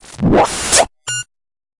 Attack Zound-85
A strange, harsh electronic effect. Suitable for clicks'n'cuts. This sound was created using the Waldorf Attack VSTi within Cubase SX.